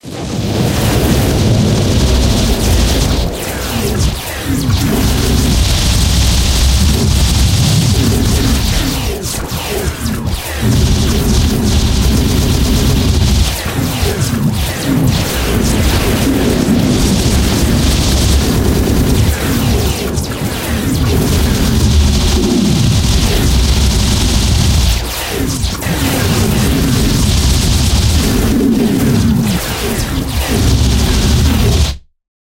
Futuristic Battlefield
Source sounds - Laser sound effects made in NI Massive. Cinematic bass drums. and drones all processed in Alchemy's spectral synthesis function. Then sent to New Sonic Art's 'Granite' for an extremely heavy touch of granular manipulation mainly using the 'space' and 'speed' function.